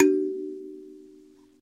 first ping of steel pingers on coconut piano from horniman museum
dare, blue, right, baby, move, ping, bailey, now